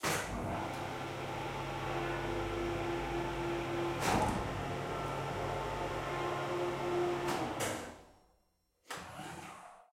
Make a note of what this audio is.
Machine Handicap Lift
Mechanical servos in a handicap lift system installed in the interior of a building.
field-recording, mechanical, machine, robotic, servo, industrial